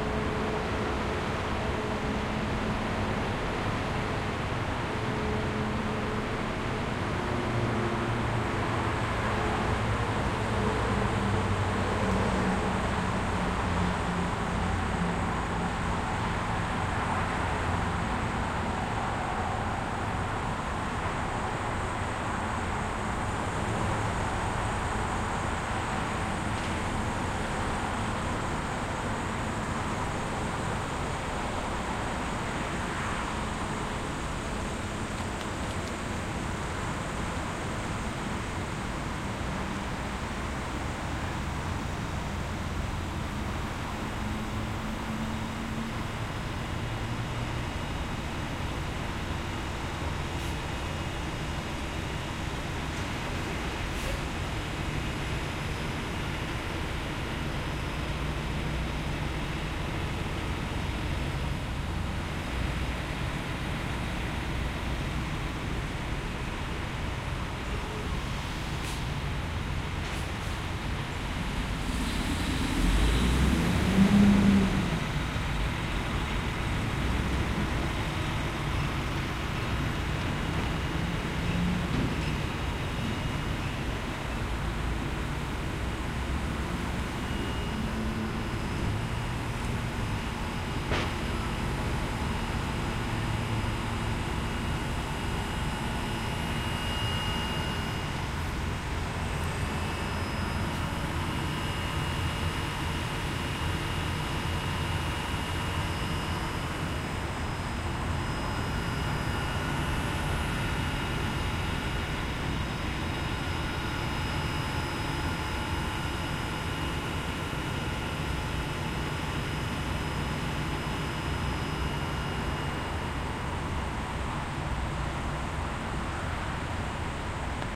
gas station walkaround
walking around the gas station
ambience, field-recording, traffic